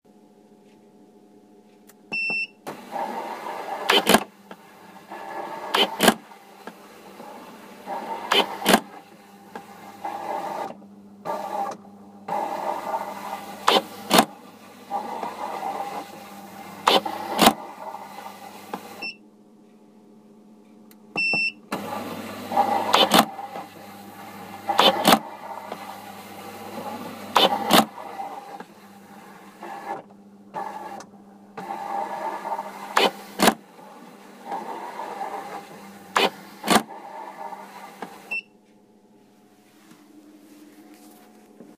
mono recording (iPhone) of a coin counter machine, no coins in.
whir, money